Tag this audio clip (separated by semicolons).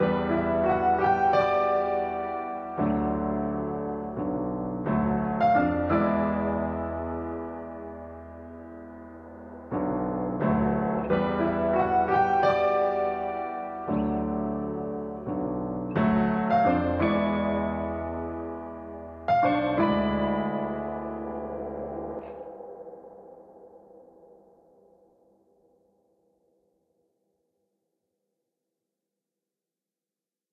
hiphop; jazz; piano; rhodes; chill; dnb; sample; keyboard; guitar; blues; wonky; loose